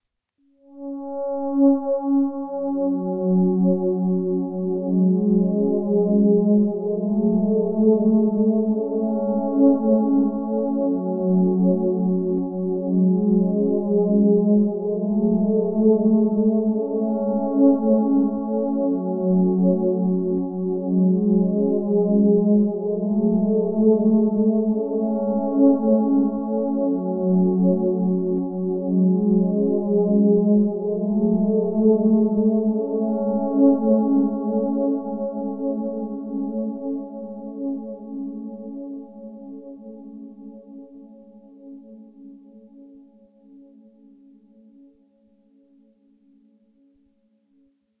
FM pad
A little loop of a pad in a volca FM synth, 120BPM.
ambiance, digital, electronic, fm-synth, fm-synthesizer, korg, pad, synth, synthesizer, volca, volca-fm